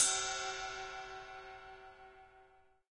rides - bells, ride, bell, dw, ludwig, yamaha, tama, crash, cymbals, drum kit,
drums, percussion, sabian, cymbal, sample, paiste, zildjian, pearl